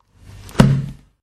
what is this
Closing a 64 years old book, hard covered and filled with a very thin kind of paper.
loop, paper, noise, percussive, household, book, lofi